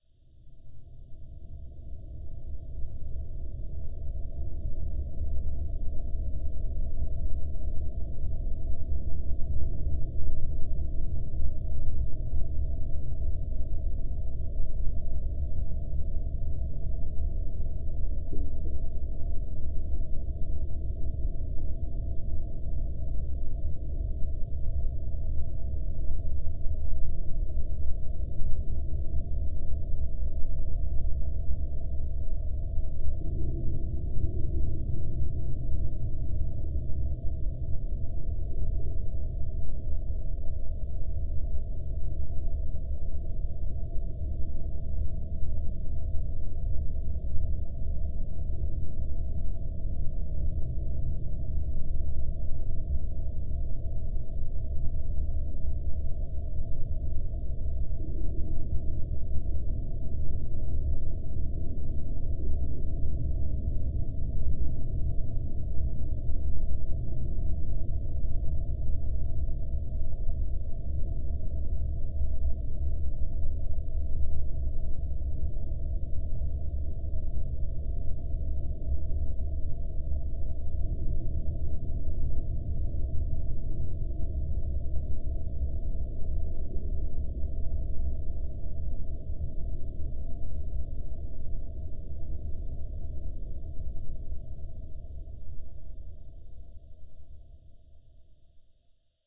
Space Atmosphere 03
For best experience, make sure you:
* Don't look at the sound waves (the sound display) at all!
* Is in a pitch-black, closed room.
* For ultra feeling, turn up the volume to 100% and set the bass to maximum if you have good speakers! Otherwise put on headphones with volume 100% (which should be high but normal gaming volume).
* Immerse yourself.
Space atmosphere. Mysterious sounds and noises.
This sound can for example be used in action role-playing open world games, for example if the player is wandering in a wasteland at night - you name it!
strange, space, universe, ambiance, creepy, movie, film, cosmos, ambient, mystery, atmosphere, game, ambience, unknown, mysterious